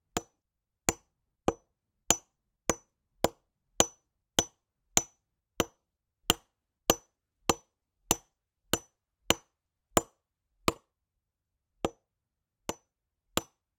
Wood Chop 01
Chopping wood with a hatchet.
Rode M3 > Marantz PMD661.